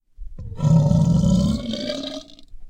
Death 1 - The Ridge - Spanker
Part of a boss-enemy I made for a student-game from 2017 called The Ridge.
Inspired by the Bioshock Big Daddy and The Boomer from Left 4 Dead.
Recorded with Audacity, my voice, a glass of water and too much free-time.